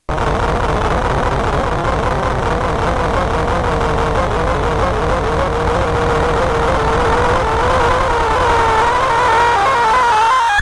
Sound demonstration of the Selection Sort algorithm with an array of 100 components.
algorithm, array, programming, selection, sort, sorting, sound